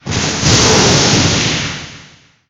explosion sound
Blowing in the mic created this. Enjoy!
boom, explosion